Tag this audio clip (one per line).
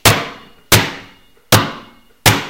ball
Bouncing
bounce